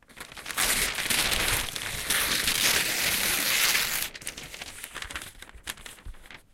rustle.paper Tear 5
recordings of various rustling sounds with a stereo Audio Technica 853A
cruble, noise, paper, rip, rustle, scratch, tear